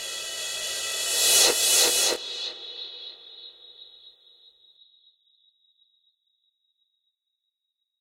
EFX sound created by Grokmusic on his Studios with Yamaha MX49
one-shot, drums, cymbals